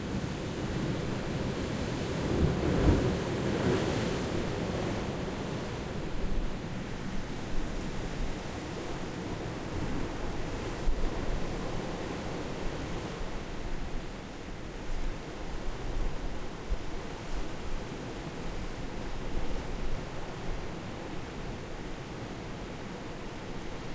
Wave Mallorca 4 IBSP2
16 selections from field recordings of waves captured on Mallorca March 2013.
Recorded with the built-in mics on a zoom h4n.
post processed for ideal results.
athmosphere, field, field-recording, mallorca, mediterranean, nature, recording, water, waves